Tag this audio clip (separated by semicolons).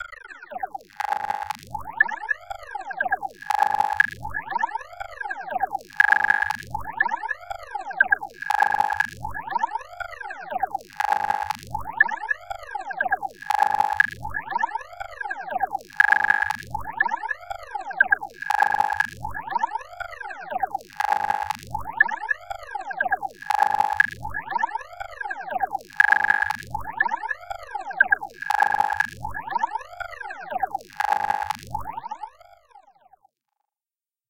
machine; electronic; robot; scan; scifi; sci-fi; malfunction; alien; trill